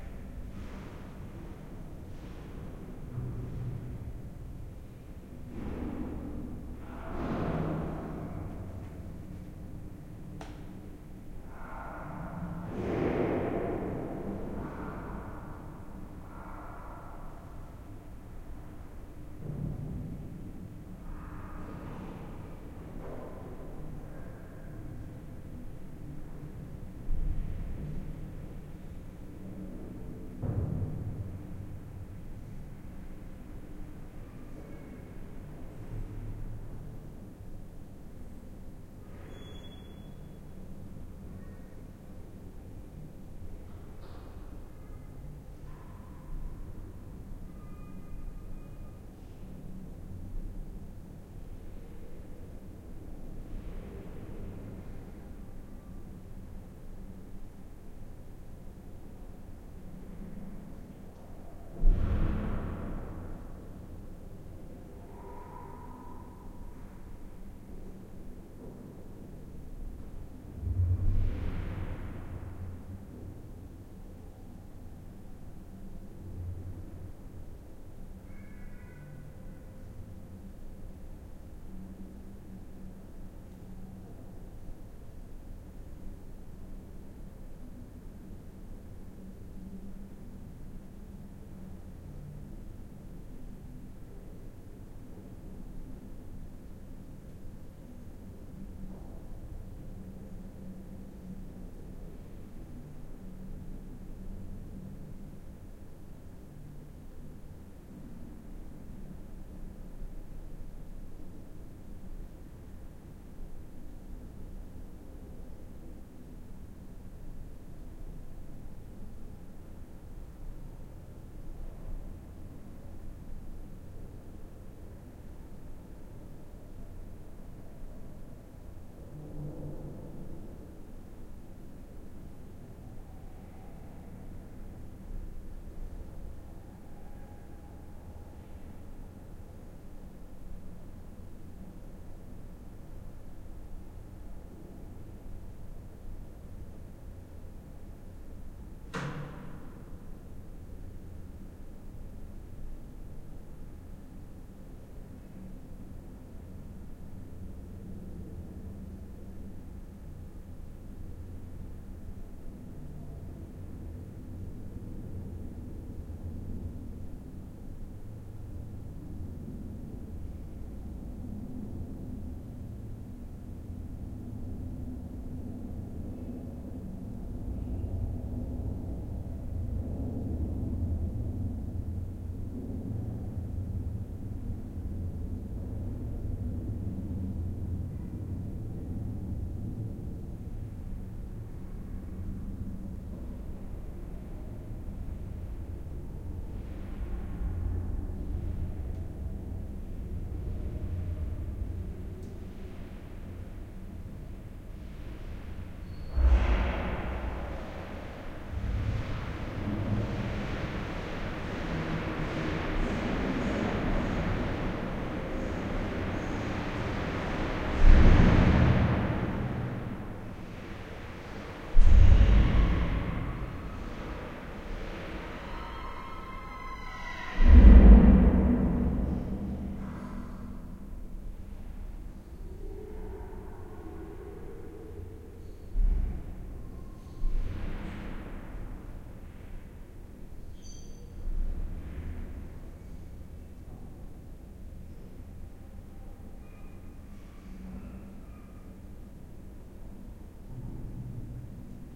Stairs Int Amb of huge building reverberant doors lift
Doors, reverberant